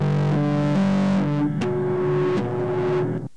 Snippet I found in between prank phone call tapes I made around 1987. Peavey Dynabass through Boss Pedals and Carvin Stack.